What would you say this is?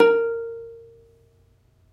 Notes from ukulele recorded in the shower close-miked with Sony-PCMD50. See my other sample packs for the room-mic version. The intention is to mix and match the two as you see fit.
These files are left raw and real. Watch out for a resonance around 300-330hz.